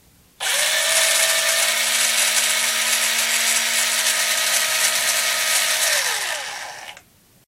More sounds of the screwdriver running.
buzz, drill, electric, machine, mechanical, motor, screwdriver, tool, whir